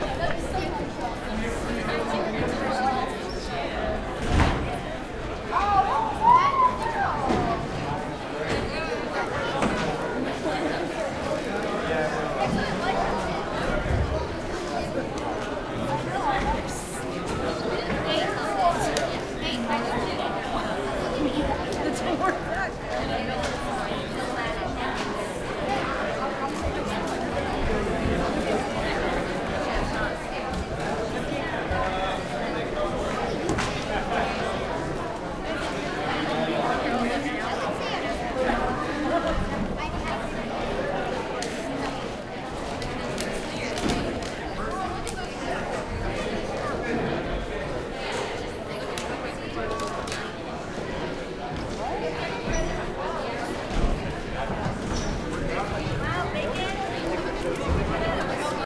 crowd int high school students gym active almost mono
crowd int high school gym active almost mono
crowd, gym, high, int, school, students